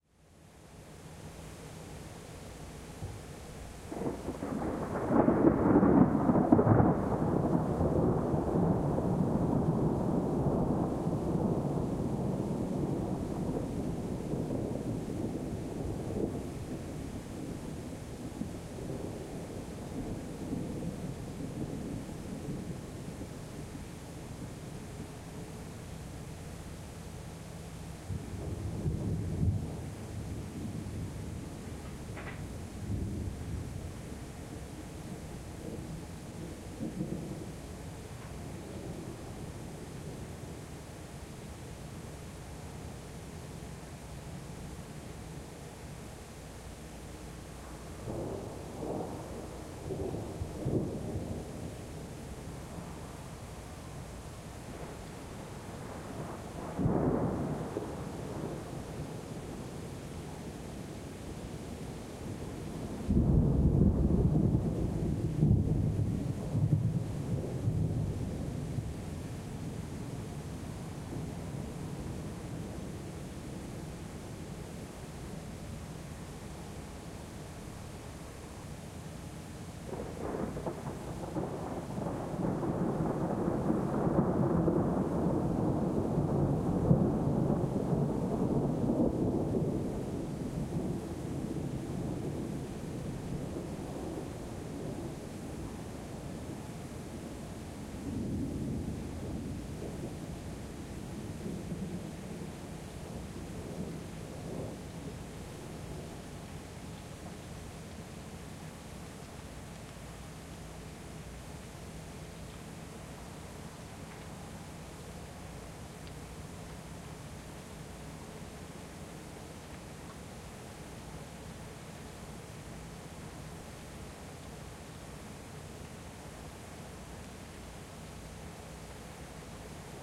Some more nice thunderclaps from the same storm.
1:18 - Recorded July 1988 - Danbury CT - EV635 to Tascam Portastudio.
field-recording, soundeffect, thunder, thunderstorm